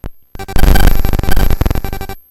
These are TR 505 one shots on a Bent 505, some are 1 bar Patterns and so forth! good for a Battery Kit.
glitch, distorted, higher, 505, beatz, bent, a, than, drums, hits, oneshot, hammertone, circuit